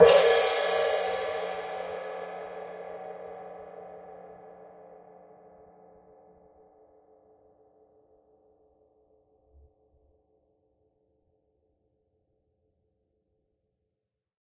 Single hit on an old Zildjian crash cymbal, recorded with a stereo pair of AKG C414 XLII's.